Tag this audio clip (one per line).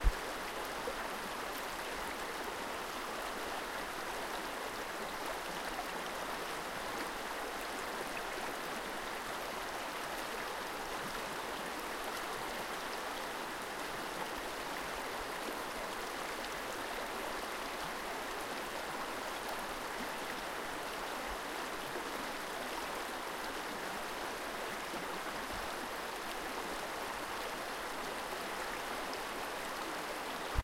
flow
river
splash
Stream
water